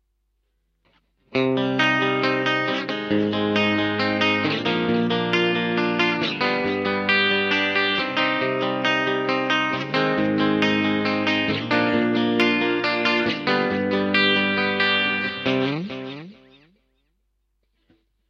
electric guitar chords5
chordes, clean, guitar